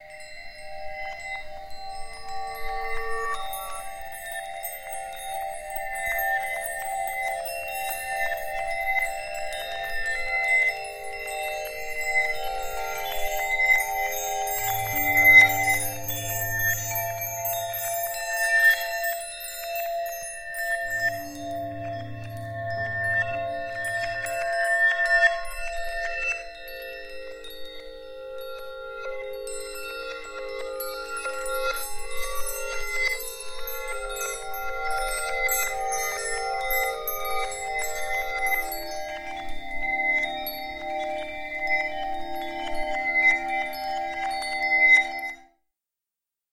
magical-background
Original effects were reversed, pitch-shifted, slowed down etc.. Used as background for a production of A Midsummers Night Dream.
background, dreamlike, magic, sparkly